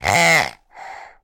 Squeaky pig dog toy single snort snorting grunt squeak oink (24)
One of a series of recordings of a squeaky rubber dog toy pig being squeazed so it grunts
rubber, squeak, plastic, squark, toy, dog